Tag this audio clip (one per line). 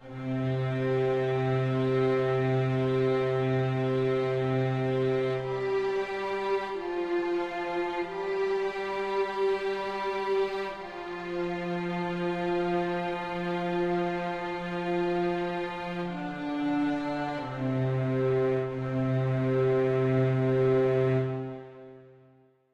90-bpm
loop
Stringsynth
synth